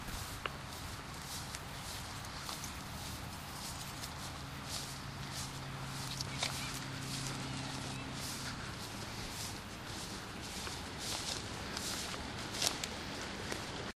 washington potomac walking
Walking towards the Potomac River in FDR Memorial park recorded with DS-40 and edited in Wavosaur.
field-recording, summer, washington-dc, road-trip, travel, vacation